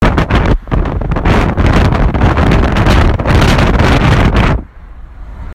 Sound of the wind while I'm driving